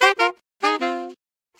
DM 150 F# SAX LINE
Jungle, reggae, DuB, roots, onedrop, HiM, rasta